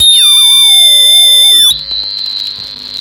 Mute Synth Fake Shortwave 004
Fake shortwave sounds from the Mute Synth